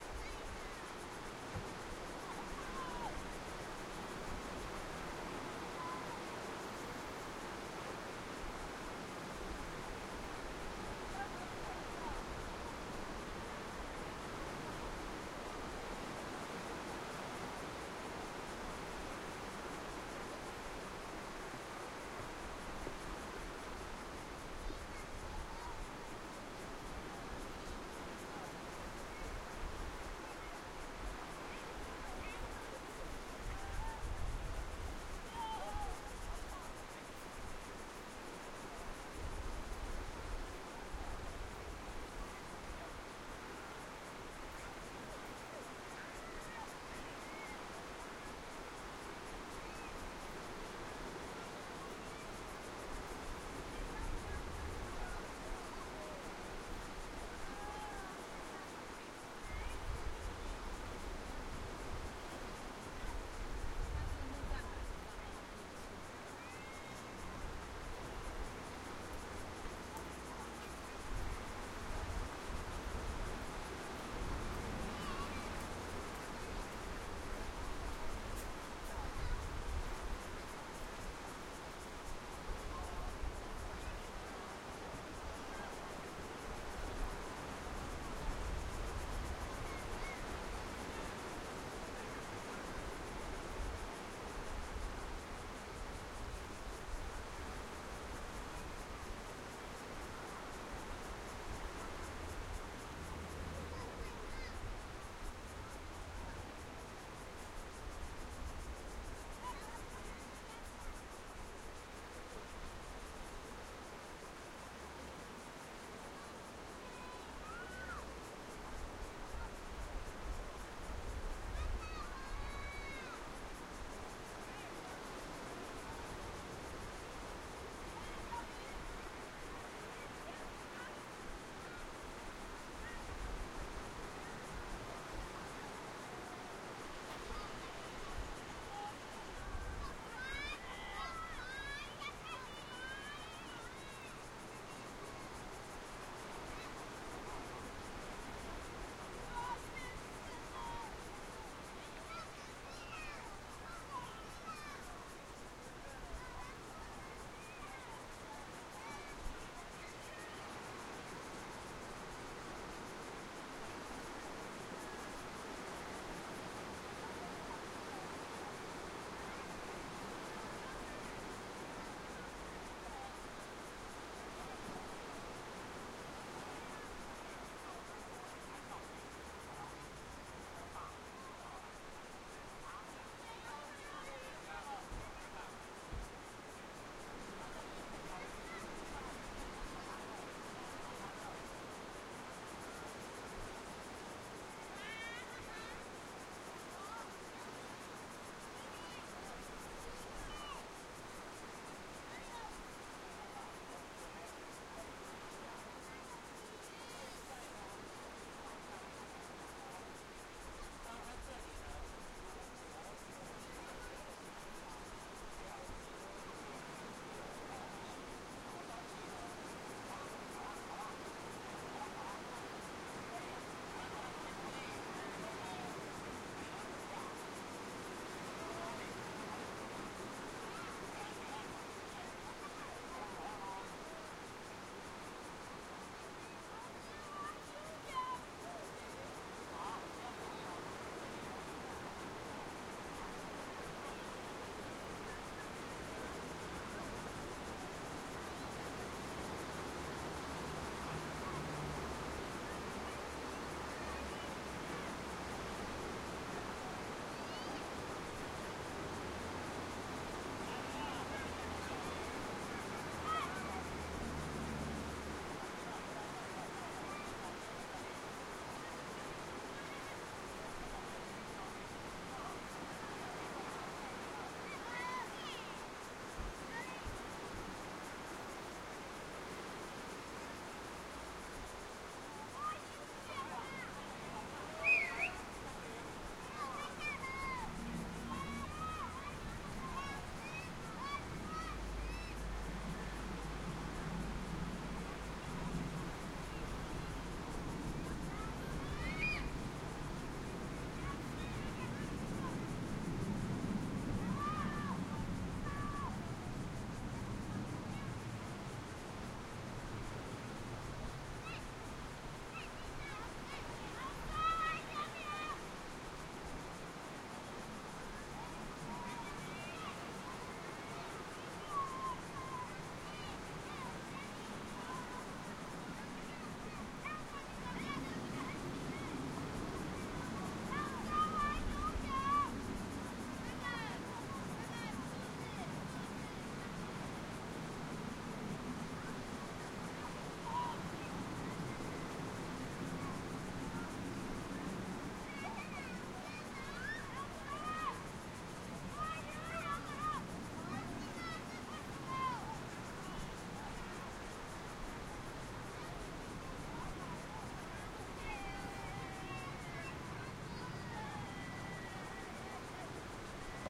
use my H6 recorder. XY Stereo. In Taiwan's some nature way.
beach, travel